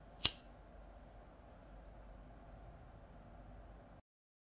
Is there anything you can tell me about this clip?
disposable cigarette lighter nm
a simple plastic cigarette lighter. recorded with a rode ntg3.
cigarette, clipper, collection, flame, ignition, lighter, smoking, spark